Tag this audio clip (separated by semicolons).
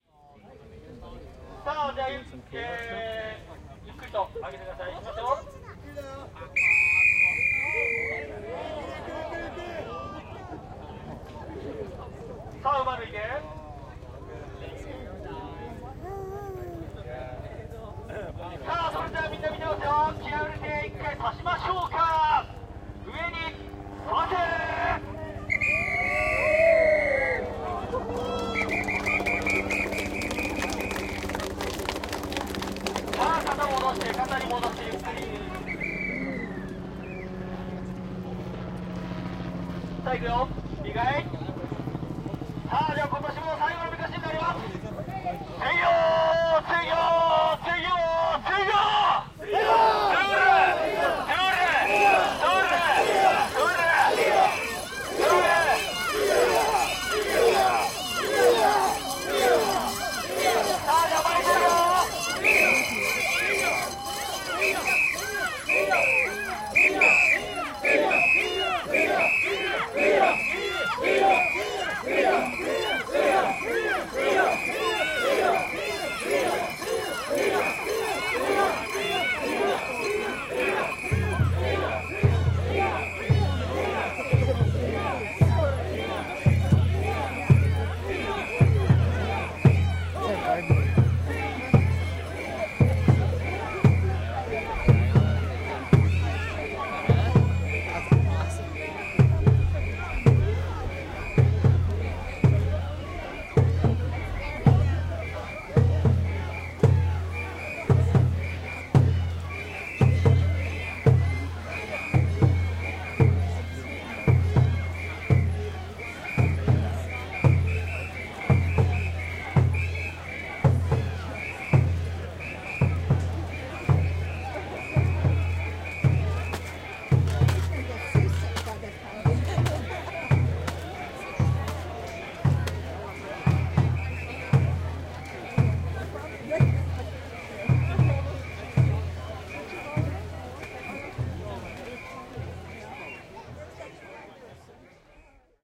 phography; shinto; ritual; japanese; recording; field